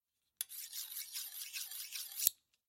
Cuchillo afila
cuchillos, espadas, Punta